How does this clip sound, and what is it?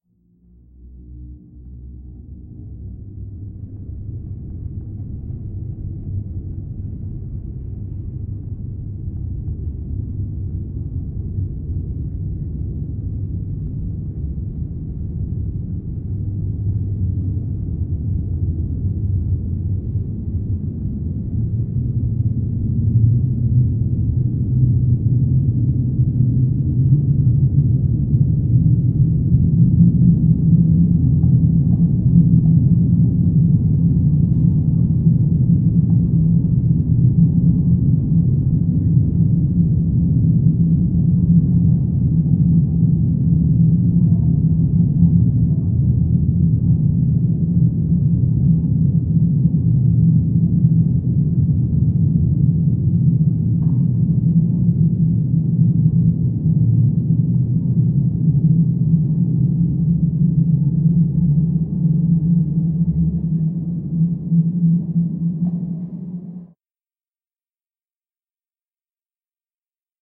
Space Sweep 10
This sample is part of the “Space Sweeps” sample pack. It is a 1:10 minutes long space sweeping sound with frequency going from low till high. Stays quite low but evolves slowly. Created with the Windchimes Reaktor ensemble from the user library on the Native Instruments website. Afterwards pitch transposition & bending were applied, as well as convolution with airport sounds.
ambient, drone, reaktor, soundscape, space, sweep